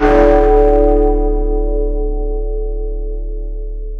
Tollbell - 4 second length
This Tollbell is exactly 4 seconds in length. Use this file for creating a sequence of bell strikes. Use original Tollbell at end of sequence for long tail at end. This file is 2 bars at 120 bpm.
large-bell, big-bell, big-ben